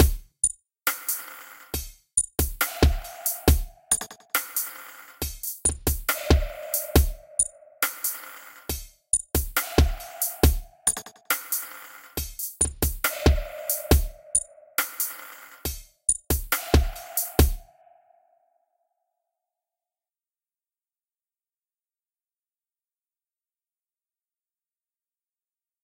min dub 03
i dont do my dubstep at half-time, hence 70 or 69. (65-75)
did this loop in reason on the redrum.
请创造伟大的东西
drums, dub, dubstep, beat, 138, loop, 69, breaks, minimal